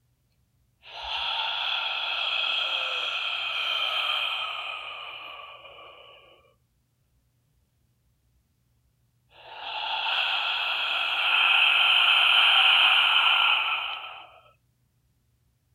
monster exhaling
Recording of a person exhaling to imitate a monster or creature breathing. Recorded on an android Moto G phone with Sony Recorder app.
breathing, monster, scary